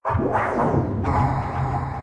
Set Off
A Type of set it off paded sound.
dark, pad